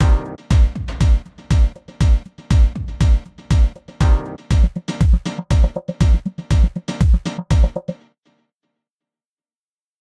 Don't Let Go LOOP 120 noVOX
Created in Reason 10 with NN19 patches. 120 bpm 4416